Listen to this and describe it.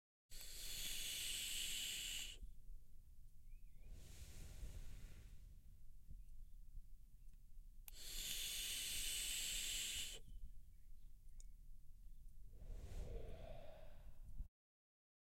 The sound of a person using a vaporizer.